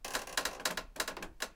Creak Wood Floor 09
A sound of a creack on a wooden floor recorded on set for a short film.
This is one of the many, so check out the 'Creacks' pack if you need more different creaks.
Used Sony PCM-D50.